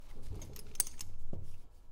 Bridal Handle On Hook Wood Wall 03
This is the sound of a horse bridal being removed from a metal hook that was screwed into a wood wall.
bridal, Horse, Noise, Handling